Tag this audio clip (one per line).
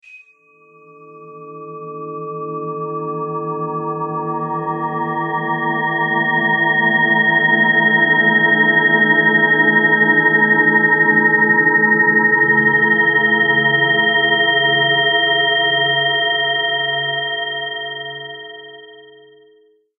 audiopaint
bell
sinewaves